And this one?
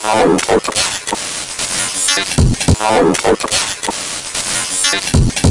mythical mouth of the ancients
drummy glitch mouth boi
digital, electronic, glitch, noise, sound-design